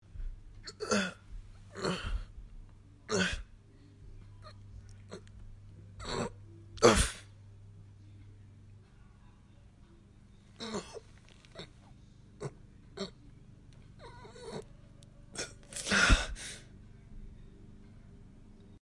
Man struggles to carry things (animation)
A man struggles to carry things. Recorded for an animation.